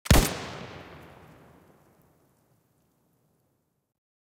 A semi-automatic rifle, unpitched
Made in bitwig, i used 10 different recorded gunshot layers that have been eq'ed and multiband compressed to form a new gun sound. Subbass was synthesized for the super low end.
The process i use is to select frequencies using high pass and lowpass filters from a recording for the lows, mids and highs. This forms a "layered sound"
A kick drum was put before the initial gunshot to give it punch and make it sound larger.